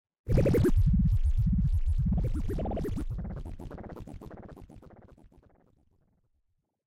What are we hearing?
made with a wavetable softsynth.